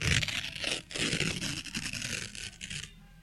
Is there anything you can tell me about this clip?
Rasgando hoja
destruir, rasgar, romper